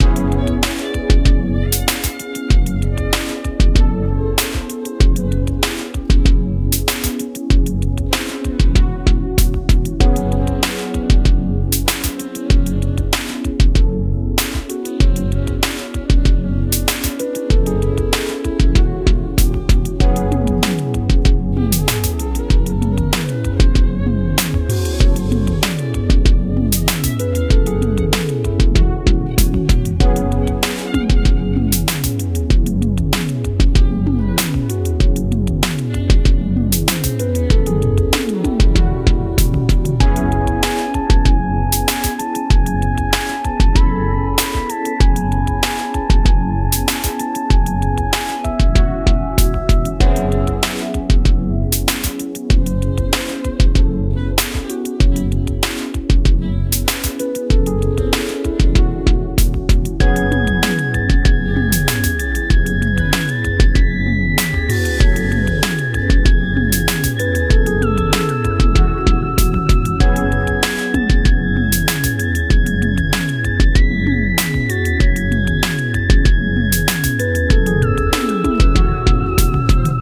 ASM goof LOOP 4B Soul
A loop created in Reason 7.